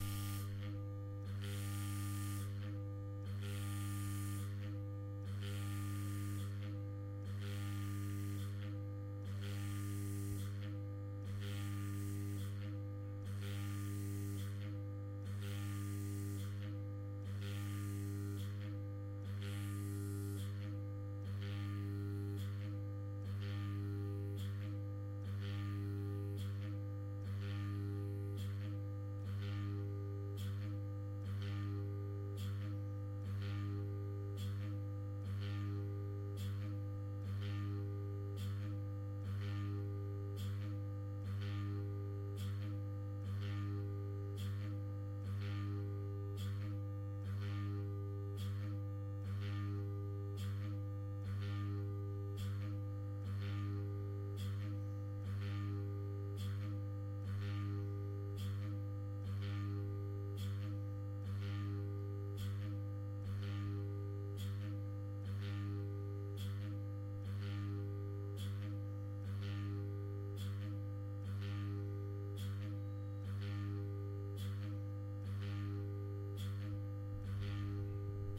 A medical vacuum pump, sounding like a pan-sonic sample